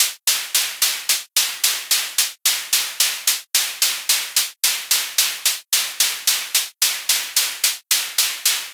Relatively low open/close hi-hats.
110bpm, drumloop, hihat